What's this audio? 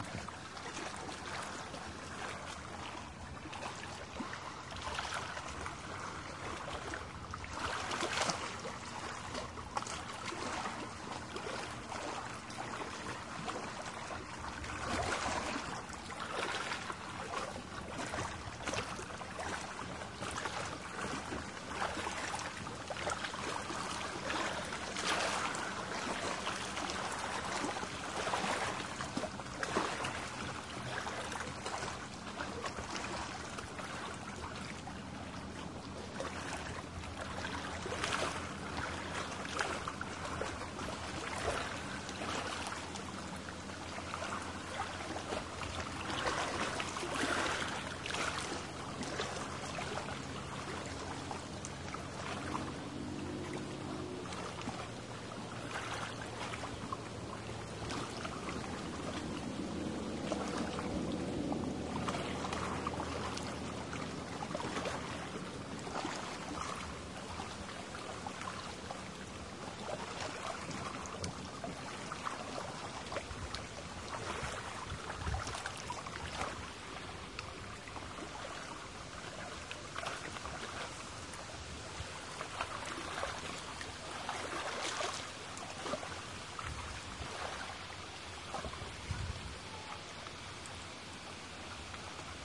Water Lap Horseshoe Lake
recorded at Schuyler Lake near Minden, Ontario
recorded on a SONY PCM D50 in XY pattern
lake, water